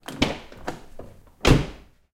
Open and Slam VW Passat Door
This is a VW Passat door being opened and slammed. Recorded inside a garage (6 x 6 meters). There is quite a bit of natural reverb captured in this sample.
car, car-door, vw-passat, door-slam, field-recording